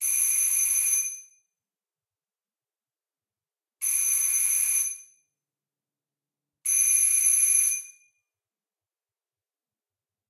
The doorbell in my apartment is so loud and obnoxious I decided to share my pain with the rest of the world, lol. In all seriousness, hopefully someone finds it useful for their production.
The first sample is the purest, with the last two I *attempted* some dampening, but the difference is really inaudible.
Recorded with Zoom H6 (XY capsule), minimal processing done (loudness normalization, slight panning rebalance, hi-pass @ 40hz).